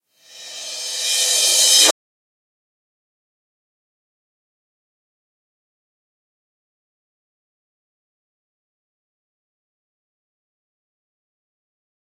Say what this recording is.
Rev Cymb 11
Reverse Cymbal
Digital Zero
cymbal, reverse